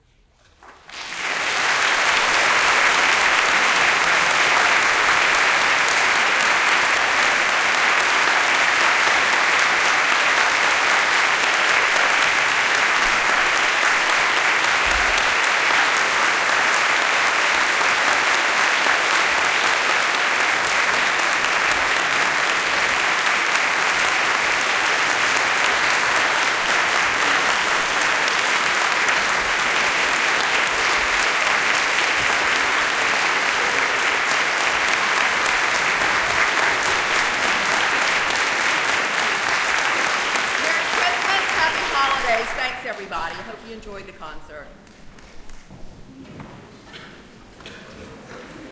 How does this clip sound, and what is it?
Loud Applause Hall
A high quality stereo recording of crowd applause in a concert hall.
crowd, designed, hundreds, people, clapping, audience, field-recording, concert